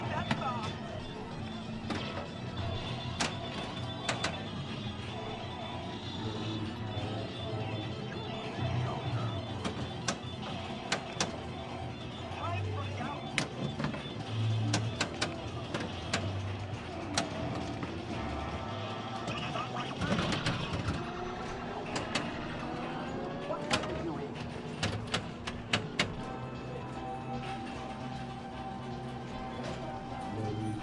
Pinball Hall Of Fame 4
Sounds from the Pinball Hall Of Fame in LAs Vegas.
arkade, game, pinball